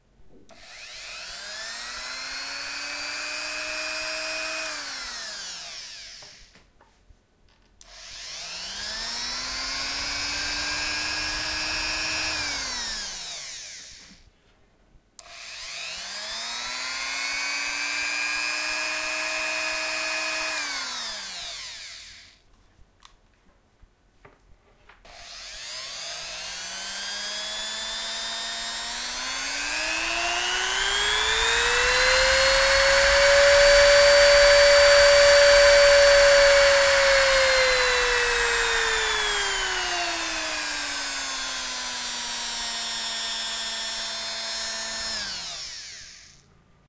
Turning on and off dremel and increasing and reducing engine speed. Recorded with Zoom H1.
Ligando e desligando micro retífica e aumentando e reduzindo a velocidade do motor. Gravado com Zoom H1.